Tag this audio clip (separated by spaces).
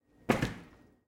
body impact